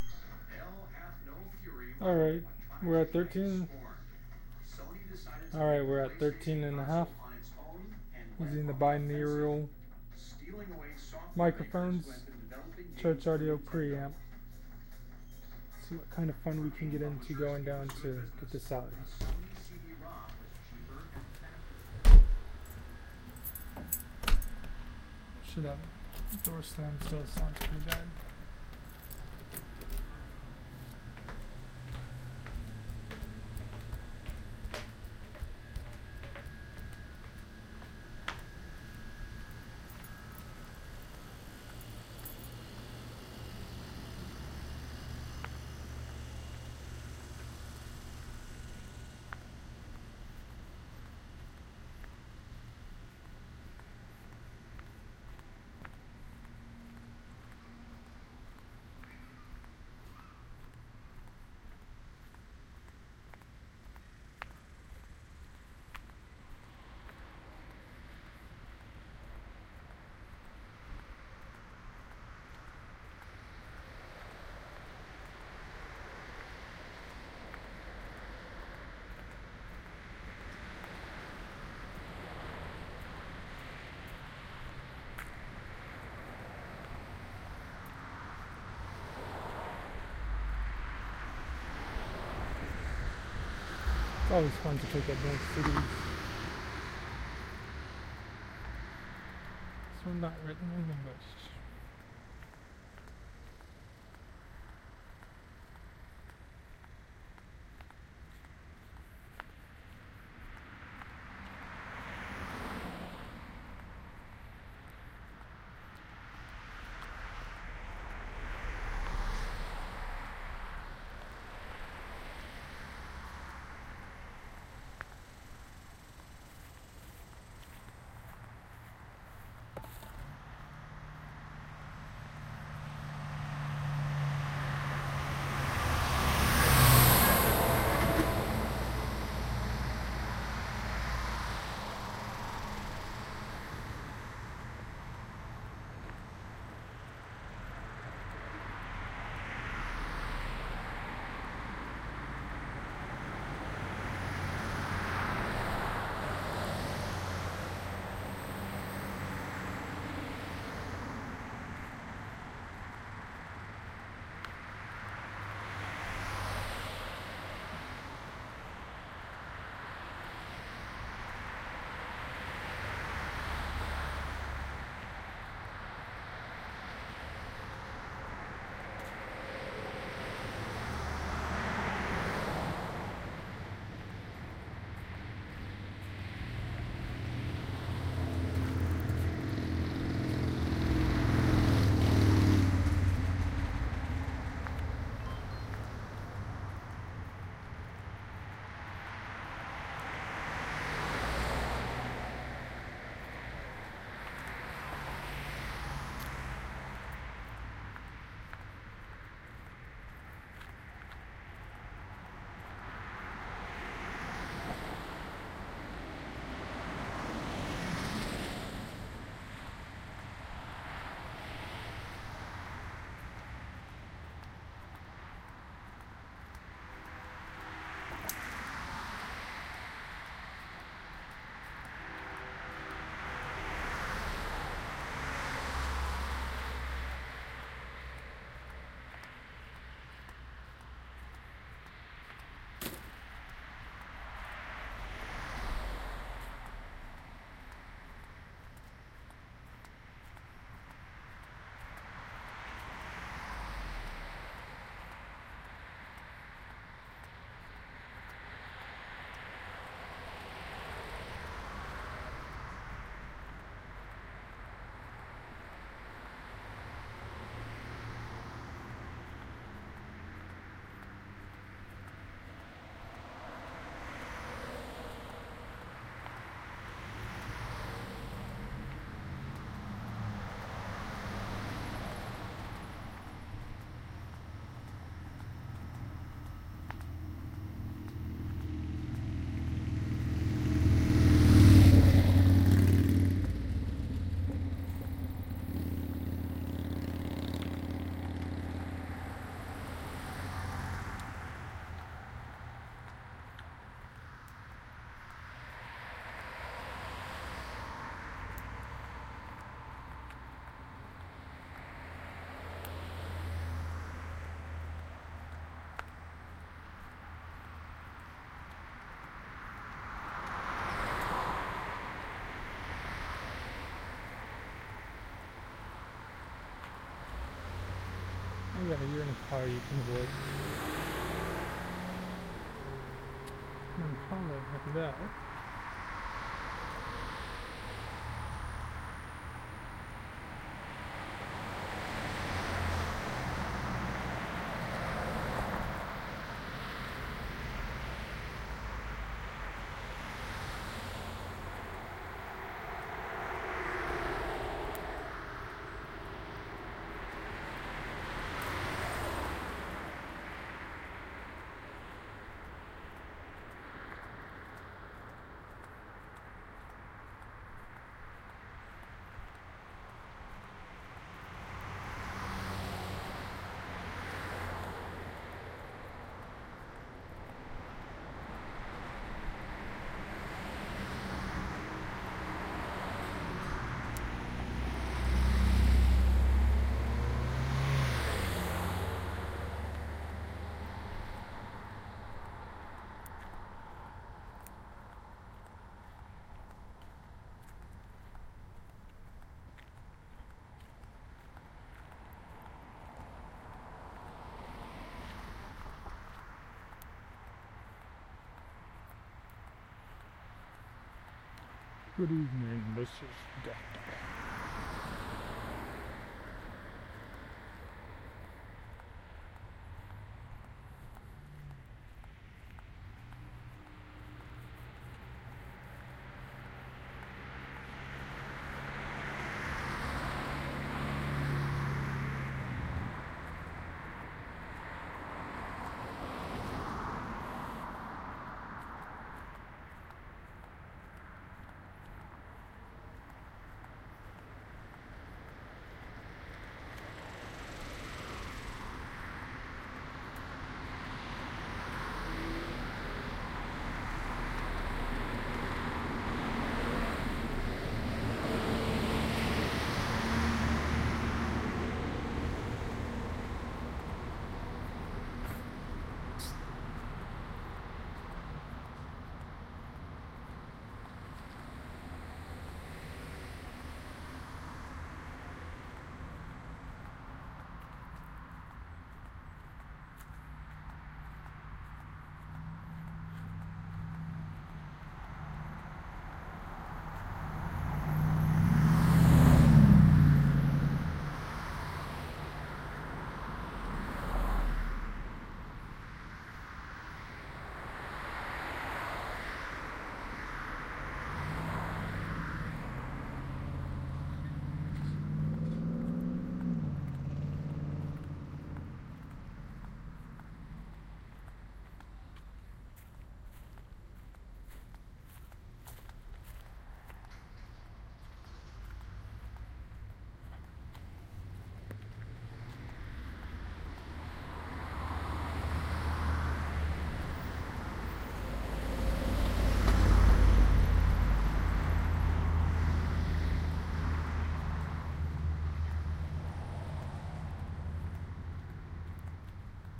You hear a little of me throughout this track. I took a five minute or so walk to get a salad from a place down the road from me. You will hear cars passing by on the right side of the channel. sound chain: binaural mics-->church audio 9100-->H120